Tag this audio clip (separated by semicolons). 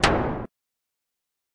Drum; Percussion